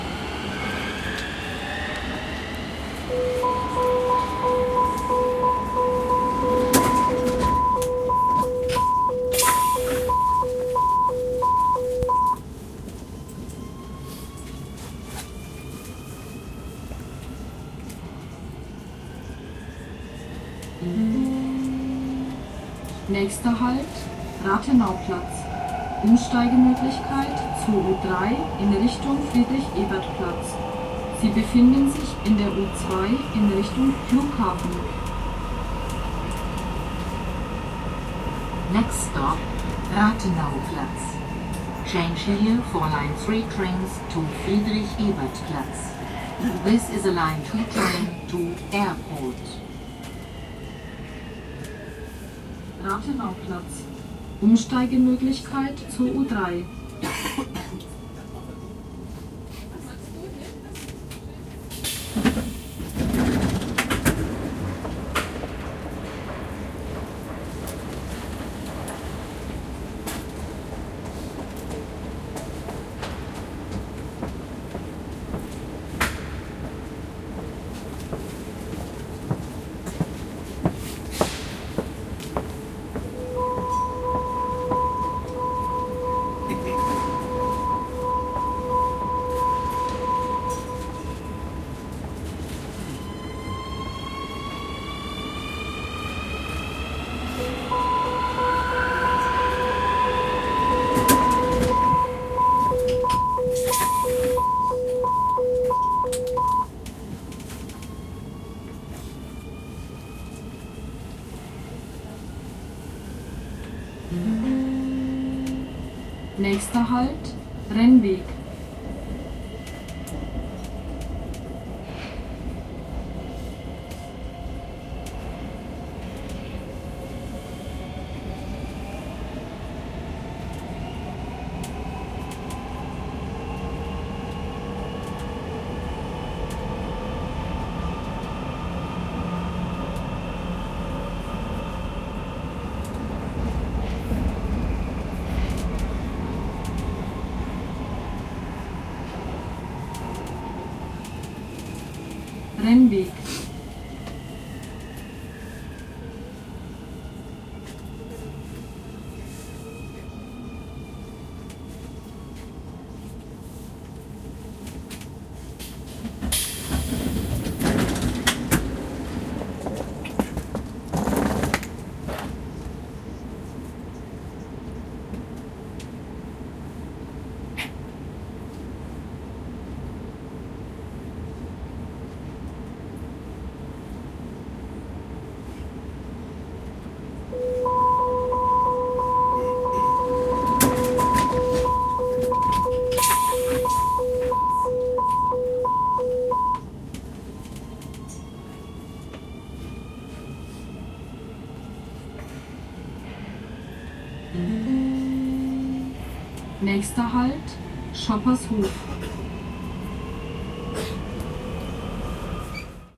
A ride by Nuernberg U-bahn. Ambience, people, voice announcing names of stations and a bell announcing closing of the doors. Recorded by Zoom H4n and normalized.
Travel, Public, Ride, Subway, Ambience, Metro, Transport, Nuernberg